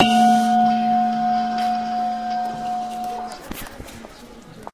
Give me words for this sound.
ikea object bell
Bell-like sound
An ikea ashtray finger-soft-tapped (wtf) while people walk around makin´ complicated mental operations to adjust costs...
Mobile phone was inside the metal ashtray, so i had to edit the cut.
ambient, ashtray, bell, bell-like-object, ikea, mobile, recording, sounds